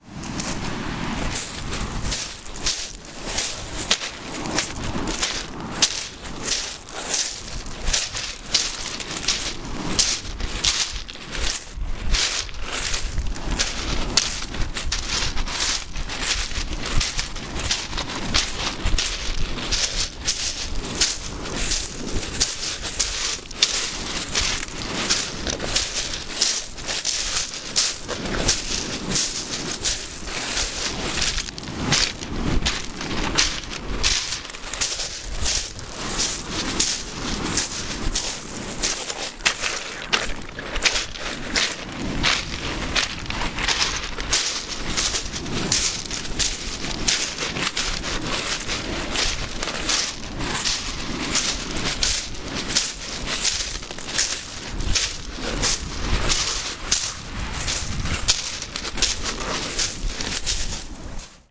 Footsteps on rocky beach at the Channel Coast at Étretat, waves in the background, walking speed - recorded with Olympus LS-11
beach, channel-coast, feet, foot, footsteps, shore, steps, stone, stones, walking